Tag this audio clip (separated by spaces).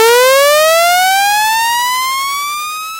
greencouch wow language man murmur sfx scream weird cartoony sound-design funny talk vocal shock